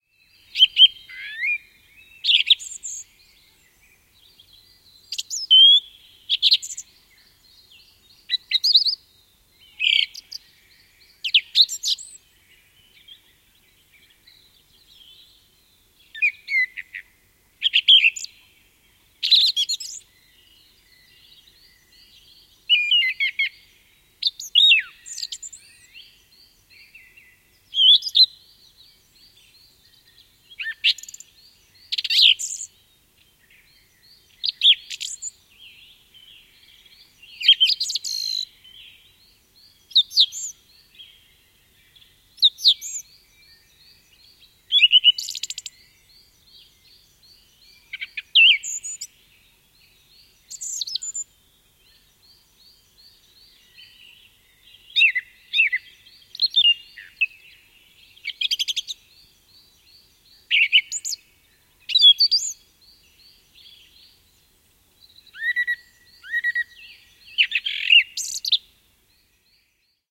Laulurastaan kirkasta laulua varhain aamulla.
Paikka/Place: Suomi / Finland / Liljendal
Aika/Date: 29.04.1973